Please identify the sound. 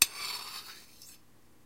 Knife scrape on another knife
blade, knife, scrape